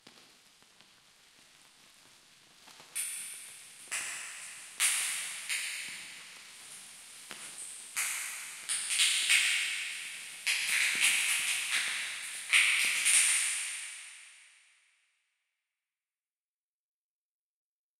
CR ExplodingAnts
The sound of exploding ants, as if being fried by a child's magnifying glass.
ant, cartoon, explosion, glass, insect, magnifying, pop, sizzle